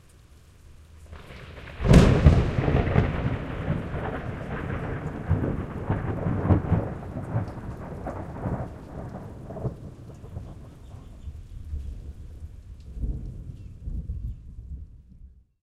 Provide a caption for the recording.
200817 Thunder, pretty close crack 4pm
Mostly dry, pretty close isolated thunder clap. Stereo EM172s.
crack, thunder, dry